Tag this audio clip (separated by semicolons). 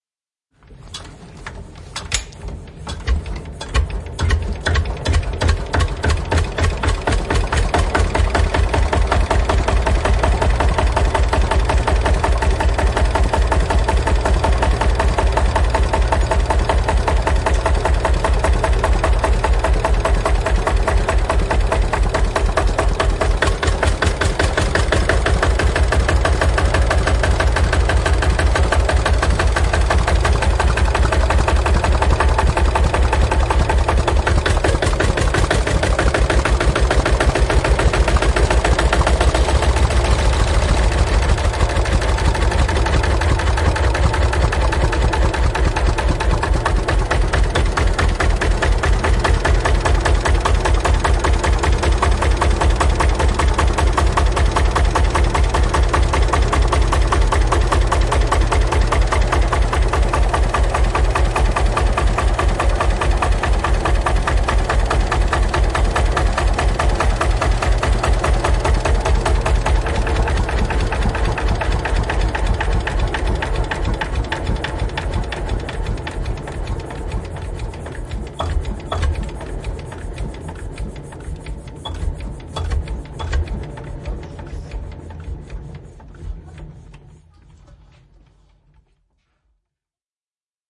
engine; field-recording; gueldner; museum; tractor; agriculture